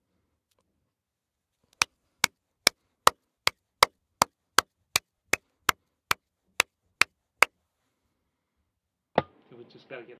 Claps02 FF053
One person clapping, medium tempo, slight tinny quality.
Clapping, claps, one-person-clapping